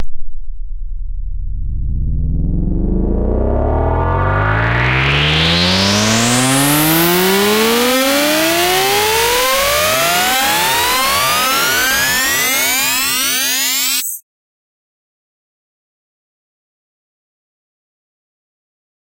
Riser Pitched 02b
Riser made with Massive in Reaper. Eight bars long.
dance, percussion, synth, trance